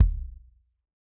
JP Kick 2 short release
Great sounding drums recorded in my home studio.
Big, Drum, Kick